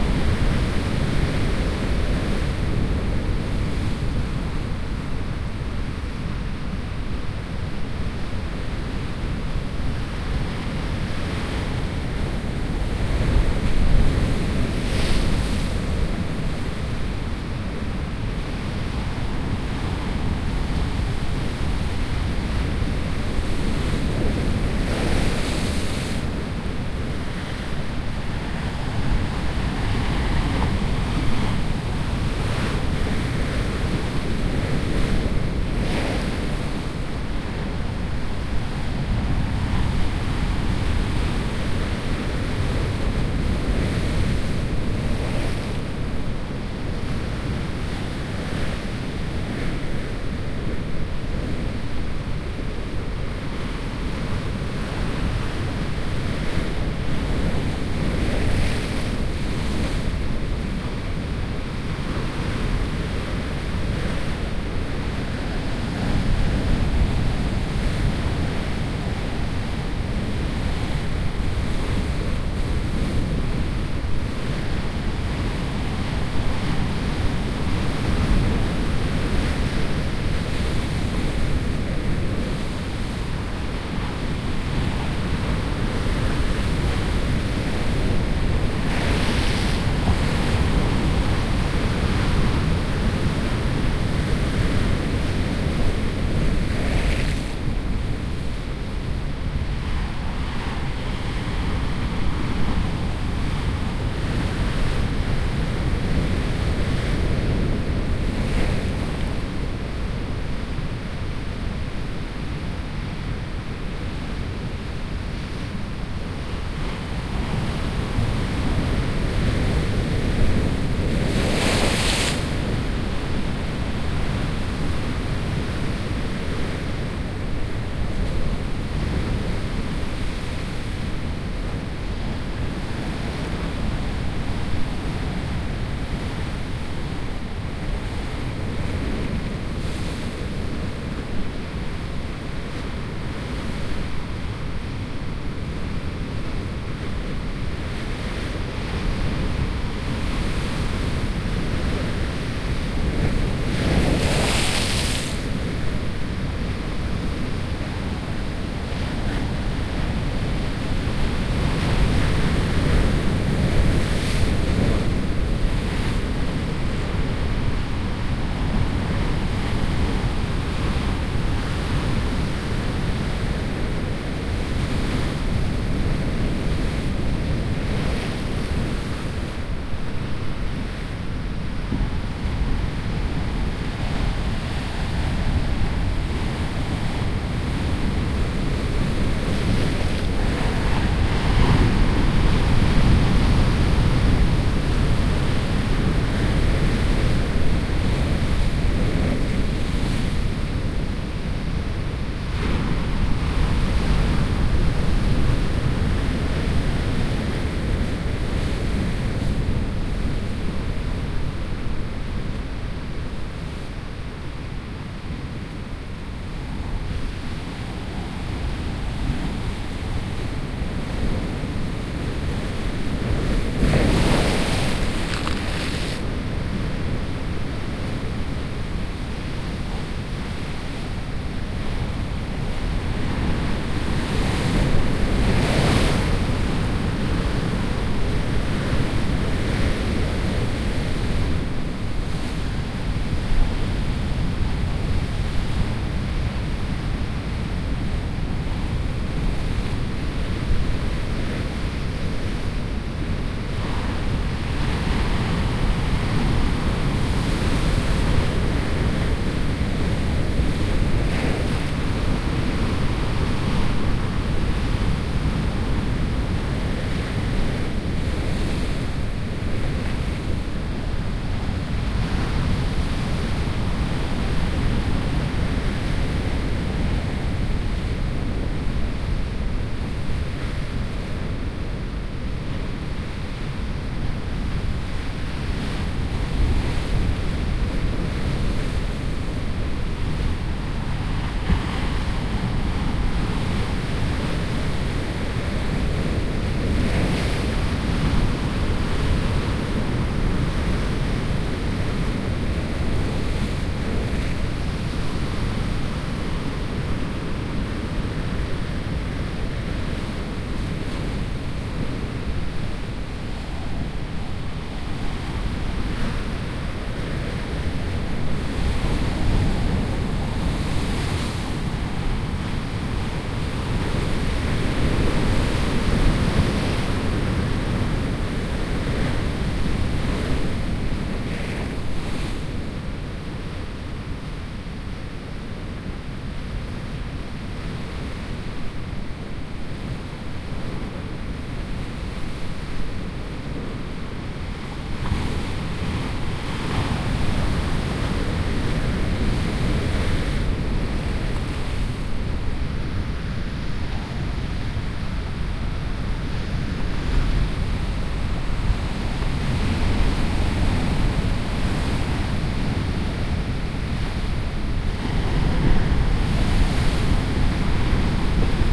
porto 22-05-14 10am sea recorded from a distance
Breaking waves recorded from a 100m distance. Some engines and birds can be heard
water, binaural, sea-side, sand, waves, atlantic, sea, rock, storm, wind, tide, ocean, beach, wave, surf, field-recording, spring